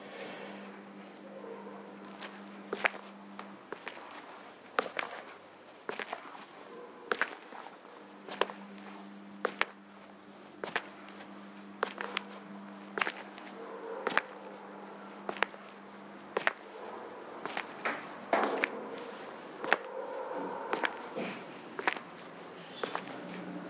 Recording of footsteps of a male walking on a tile floor wearing hard rubber slippers. Some background noise.
recording path: sanken cs2 - Zoomf8